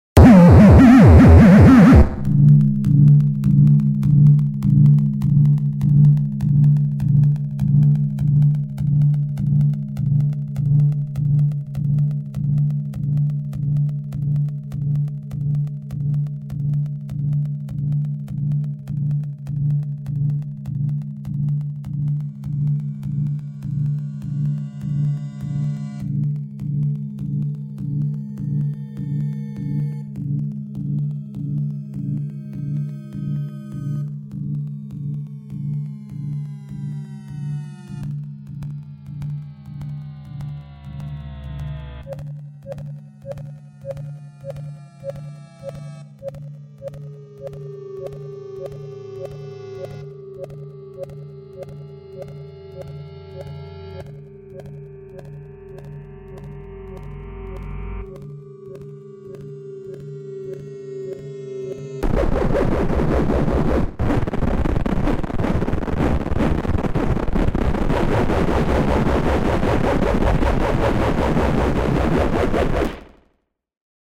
Death Star Generator Module
Emulation of the Death Star firing by using free VST instruments and analog delays, great for sound effects.
Electronic, Effect, Machines, Noise, StarWars, Space, Spacecraft, Futuristic